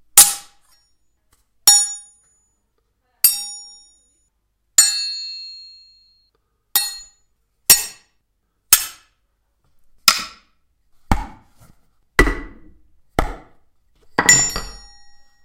Fight SFX- sword strike
block, clang, duel, fight, game, hit, impact, knife, metal, metallic, percussion, strike, sword